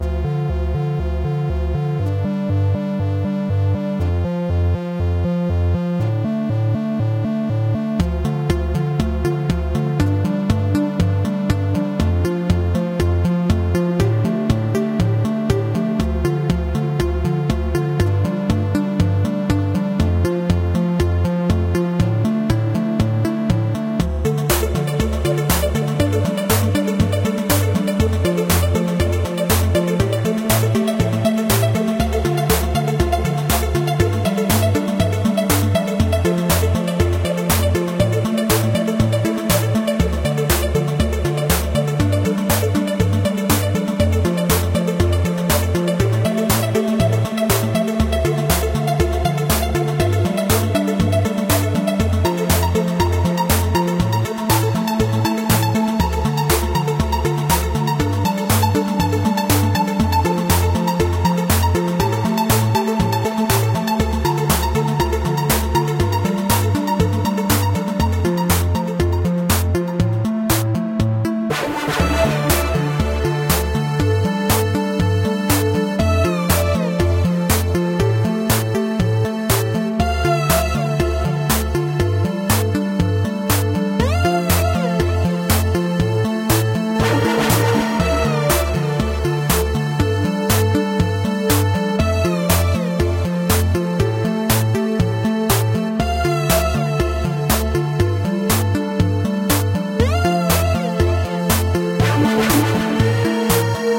Electrobeep track loop.8 Bits music
Electrobeep track loop.
Synths: Ableton live,Bleep Vsti.